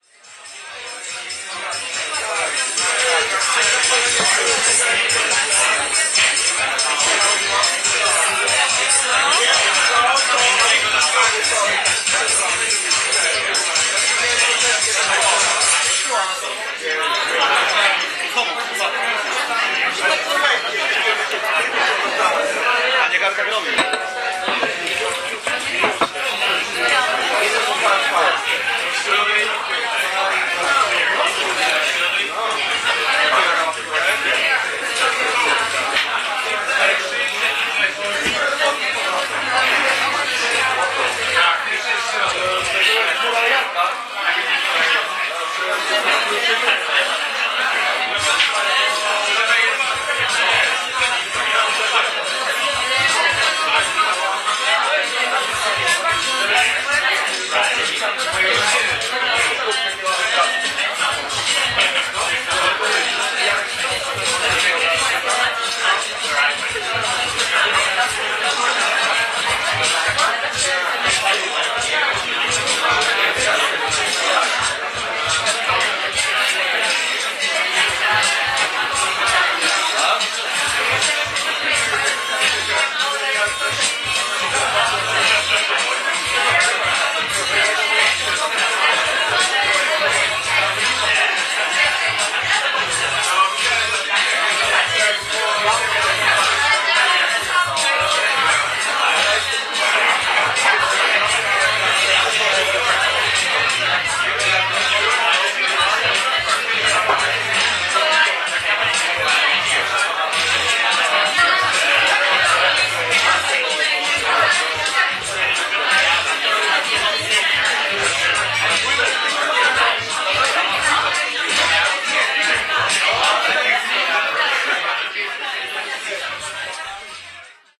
kisielice after renovation250810
25.08.2010: about 22.30 in Kisielice Club on Taczaka Street in the center of Poznan. the opening after club renovation.
bar, club, crowd, field-recording, music, noise, people, poland, poznan, pub, voices